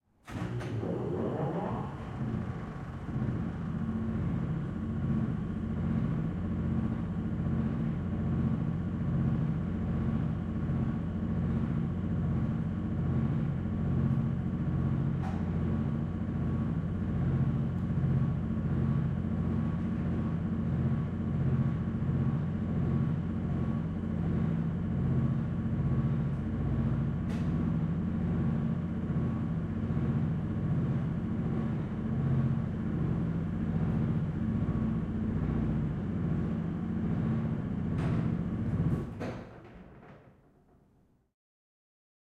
Heavy industrial elevator (CMS)
This is the sound of the 100 year old industrial elevator in the iconic Cotton Mill Studios in Oakland, California (where I moved into, a little over a month ago). The Cotton Mills Studios used to be the largest cotton mill factory in the Western United States (West of Mississippi), converted into stunning artist lofts. It is a very heavy duty industrial type of elevator, with old school 'call buttons', and secured by two gates that come up.
heavy, industrial